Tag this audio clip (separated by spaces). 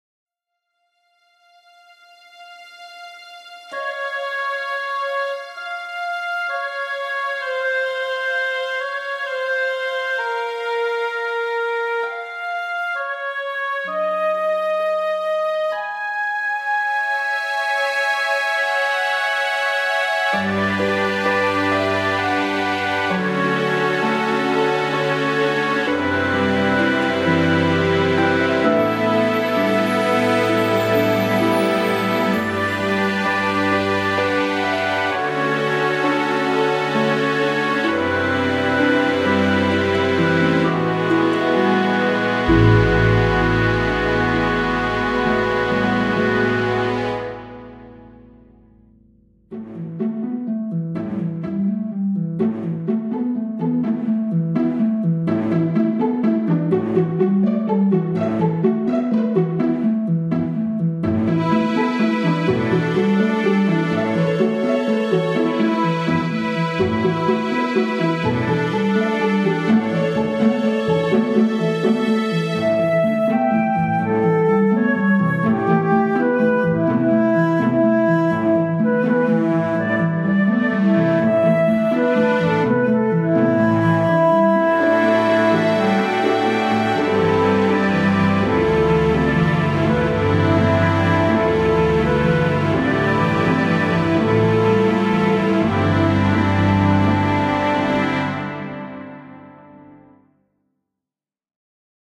acoustic arrangement beautiful cello classic classical elf fantasy flute forest game happy harp magic magical medieval music orchestra romantic rpg strings theme themes violin